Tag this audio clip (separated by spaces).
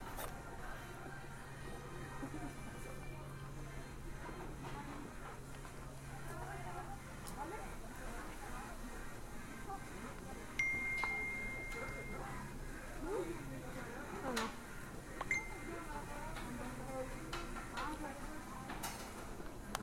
ambience city